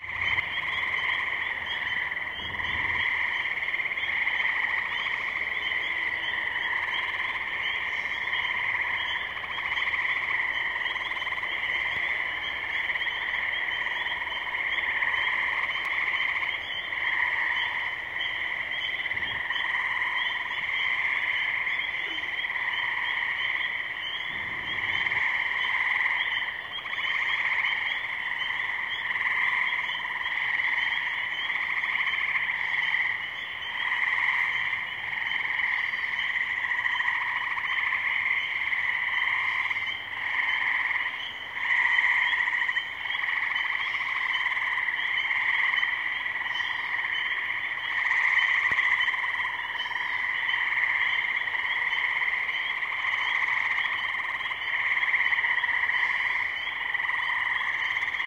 A recording of frogs and insects in a swamp at night.